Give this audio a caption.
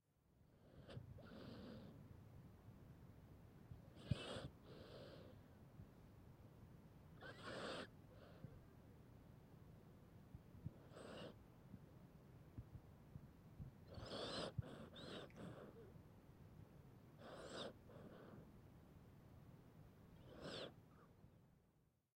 cat breathing in a flat in Vienna